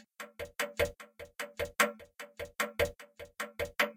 Clock Loop
A proccessed clock, turned into a loop. To hear the unproccessed sound, click on this link.
I did not make that sound. Made with Audacity.
beat, clock, clock-loop, drums, loop, music